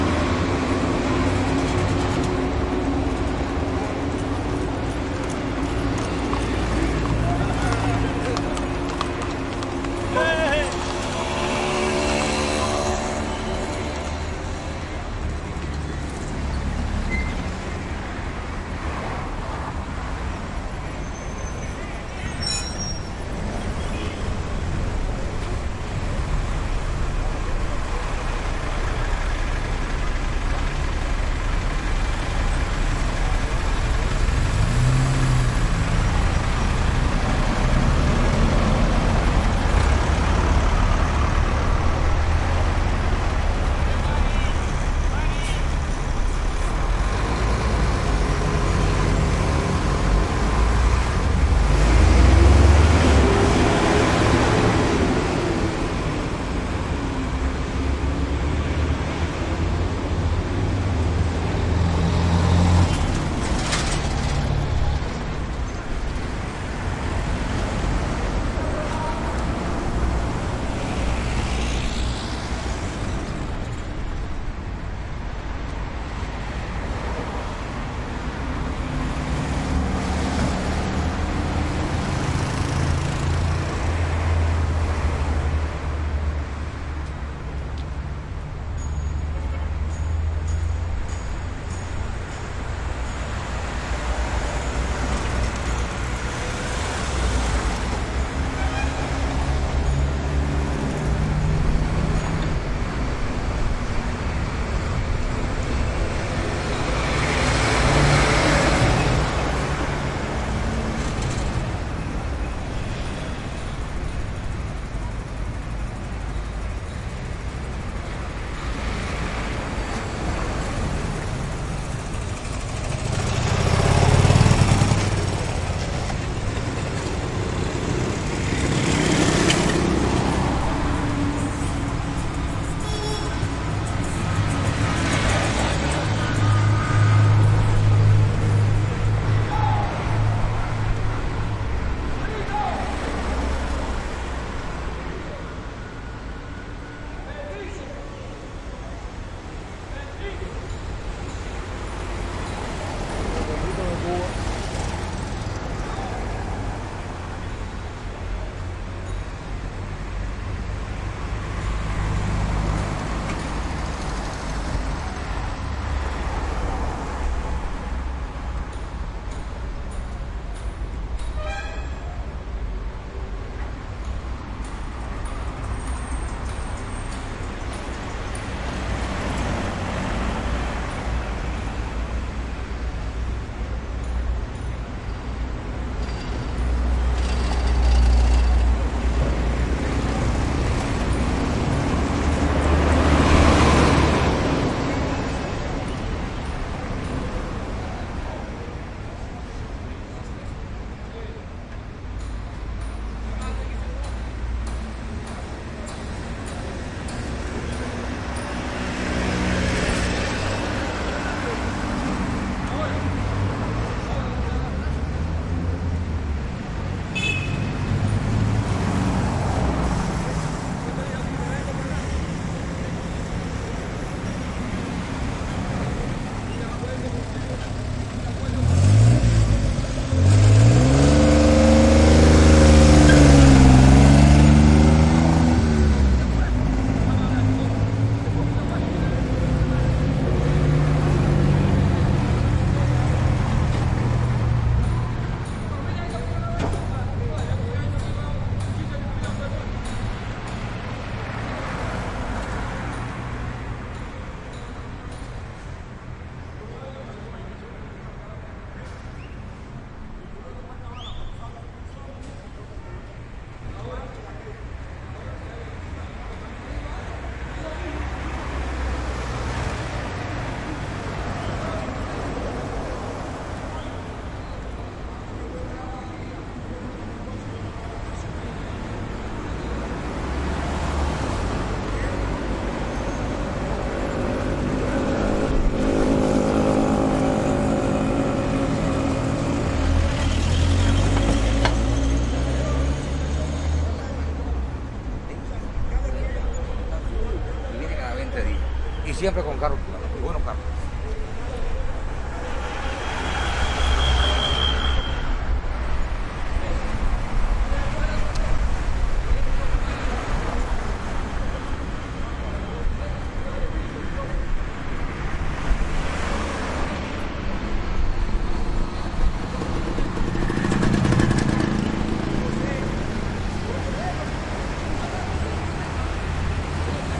traffic medium throaty heavy cars trucks mopeds Havana, Cuba 2008
street, mopeds, road, throaty, Cuba, heavy, motorcycles, traffic, medium, cars, trucks